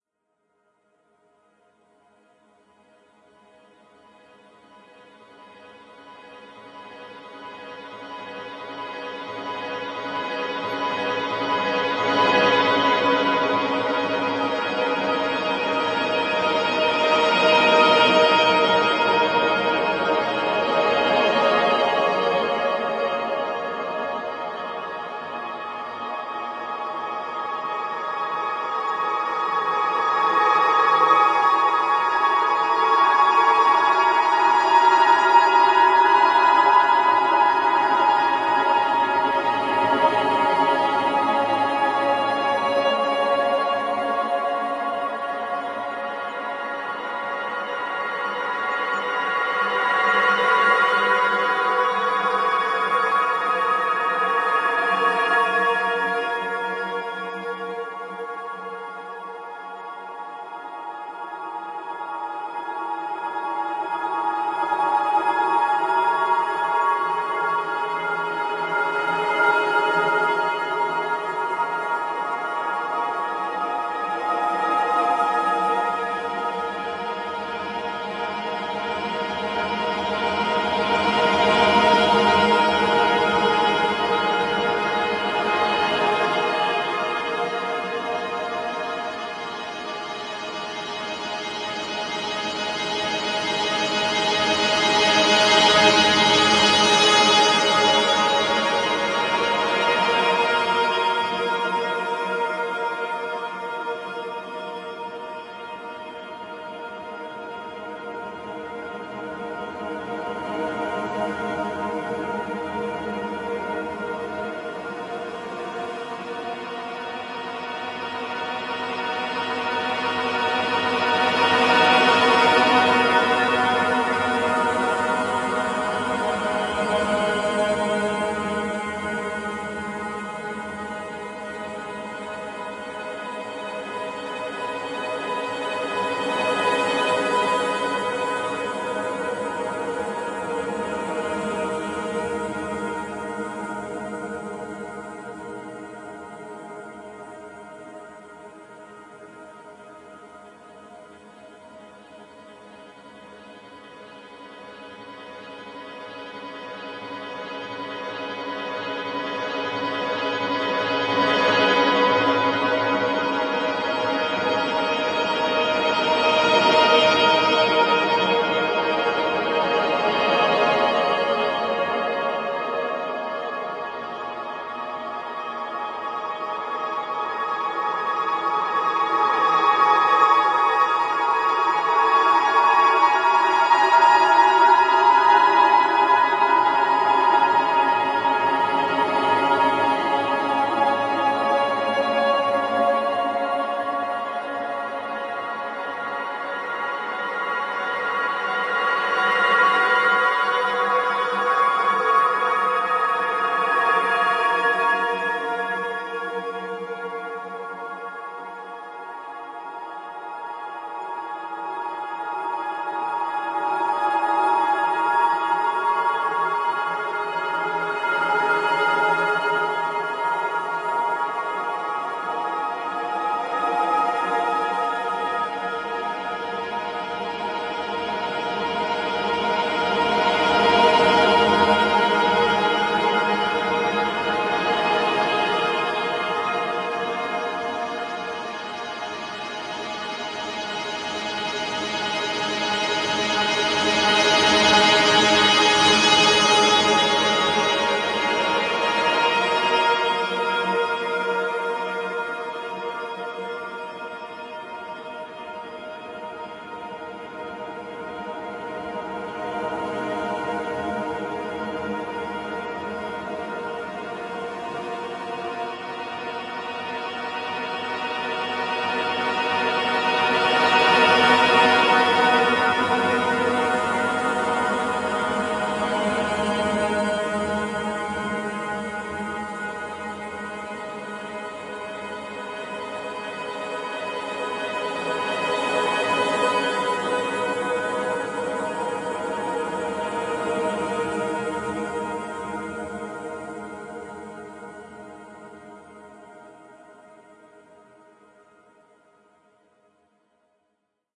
Granular Piano 01
Granular processed piano
Ambient, Noise